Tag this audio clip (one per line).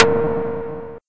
collab-1
FM
frequency-modulation
perc
percussion
synth
synthesized